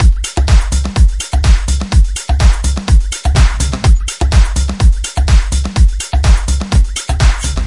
created with my personal samples with korg microsampler-edited with audacity and various vst effects
125, Audacity, beat, bpm, custom, drums, kick, Korg, loop, pattern, punch, snare